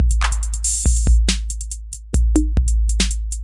70 bpm drum loop made with Hydrogen
beat, electronic